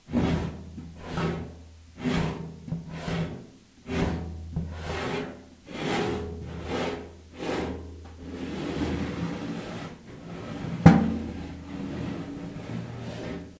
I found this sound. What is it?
Metal Drag Three
Metal, Impact, Dragged